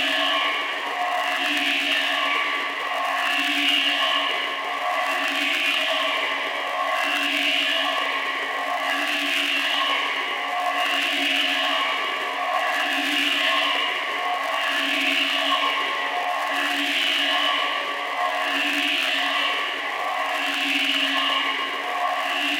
Steady State Fate Quantum Rainbow 2, Quanta, thru Intellijel Rainmaker
ambience, atmosphere, soundscape